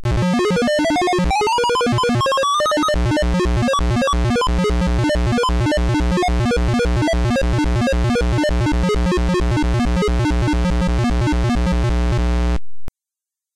sortdemo qb45 heap
Audio demonstration of the heap sort algorithm from a Quick Basic 4.5 example program called SORTDEMO.BAS
pcspeaker, beep, algorithm, sound, sort, basic, sortdemo, qb45, programming, sorting, quick-basic, heap